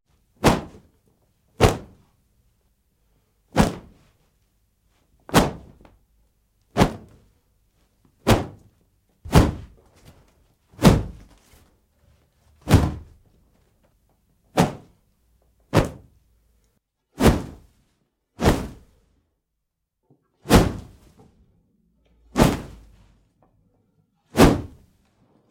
Kung-Fu Whooshes
Processed whoosh recordings for your motion graphic, fight scenes... or when you just need a little whoosh to you sound design :) Add reverb if needed and it's ready to go.
If you use them you can send me a link.
Air, fast, fly-by, heavy, Kung-fu, powerful, processed, Whoosh